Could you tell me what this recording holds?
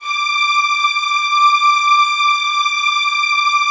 13-synSTRINGS90s-¬SW
synth string ensemble multisample in 4ths made on reason (2.5)
strings, multisample